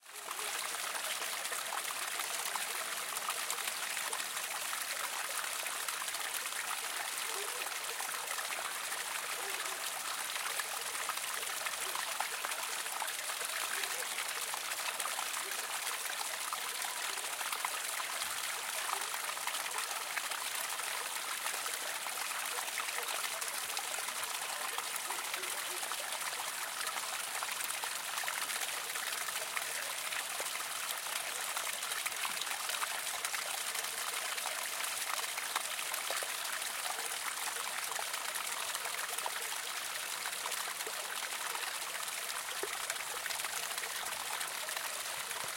Alanis - Brook near the Chapel - Arroyo camino de la ermita (I)
Date: February 23rd, 2013
There's a brook in the road to a Chapel called 'Ermita de las Angustias' in Alanis (Sevilla, Spain). I recorded some takes in different parts of its stream.
Gear: Zoom H4N, windscreen
Fecha: 23 de febrero de 2013
Hay un arroyo en el camino a la ermita llamada "Ermita de las Angustias" en Alanís (Sevilla, España). Hice algunas tomas en diferentes partes de su recorrido.
Equipo: Zoom H4N, antiviento
Espana, brook, Sevilla, agua, water, river, field-recording, Alanis, liquido, rio, liquid, grabacion-de-campo, Spain, arroyo